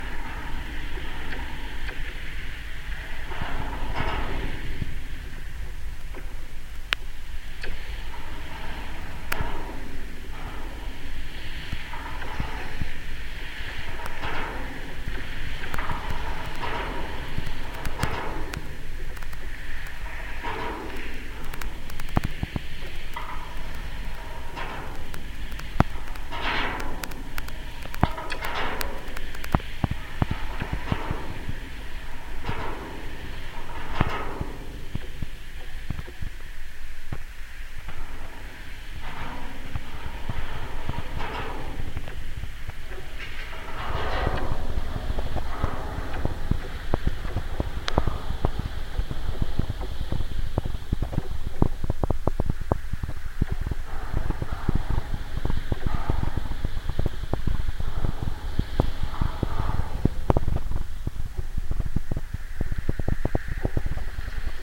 Contact mic recording of the Golden Gate Bridge in San Francisco, CA, USA at southeast suspender cluster #21. Recorded December 18, 2008 using a Sony PCM-D50 recorder with hand-held Fishman V100 piezo pickup and violin bridge.